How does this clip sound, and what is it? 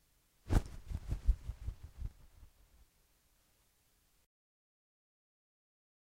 Small bird flying, close perspective
Recordist Peter Brucker / recorded 4/21/2019 / shotgun microphone / towel being flapped
beating, bird, birds, flap, flapping, flight, flutter, fluttering, fly, flying, pigeon, small, wings